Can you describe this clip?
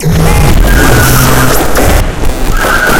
A burst of computer noise and explosion sounds that tapers off with whistling screech.
broken, glitch, computer-glitch, noise, sfx, computer, sound-effects, explosion, sound-design, broken-computer